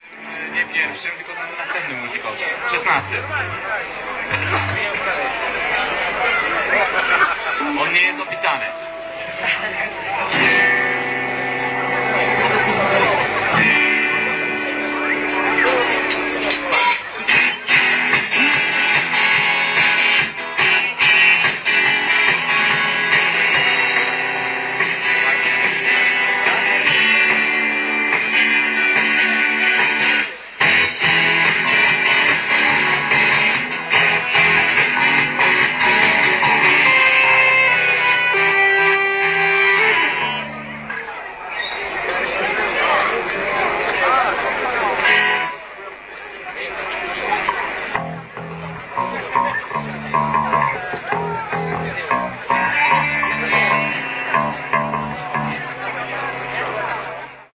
test in tuczno310710
31.07.2010: about 23.30. open-air festival in Tuczno (is a town in Walcz County, West Pomeranian Voivodeship in northwestern Poland) organised by MISIETUPODOBA (artistic association from Poznan).the test before some open-air concert.